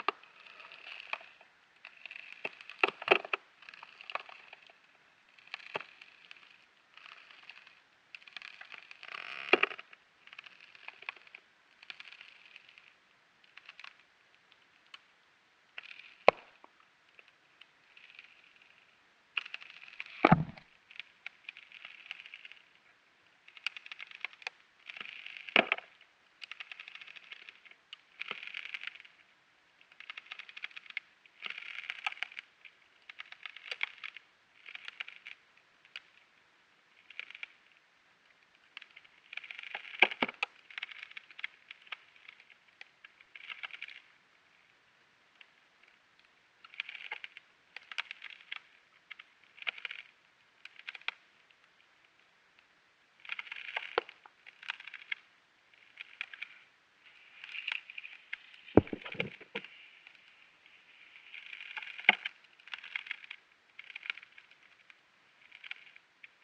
Field recording from an island just outside Helsinki, Finland. Ice is almost melted, just thin layers left.. It was a sunny day so ice kept craking, some light waves. Almost no wind.
Hydrophone -> Tascam HD-P2, light denoising with Izotope RX7
craking, field-recording, ice